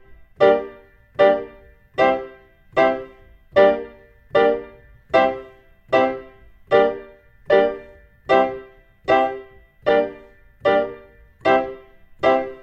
zulu 76 Gm PIANO 2
Roots rasta reggae
reggae, Roots, rasta